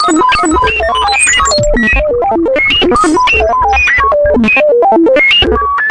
firstly i've created a few selfmade patcheswith a couple of free virtual analog vsti (synth1 and crystal, mostly)to produce some classic analog computing sounds then i processed all with some cool digital fx (like cyclotron, heizenbox, transverb, etc.)the result is a sort of "clash" between analog and digital computing sounds